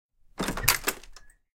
Door, Front, Opening, A
Raw audio of a wooden and glass front door being opened with a squeaky handle. I recorded this for a screen scoring and sound design recreation task for the 2016 short film "Dust Buddies", the result can be seen here.
An example of how you might credit is by putting this in the description/credits:
The sound was recorded using a "Zoom H6 (XY) recorder" on 10th April 2018.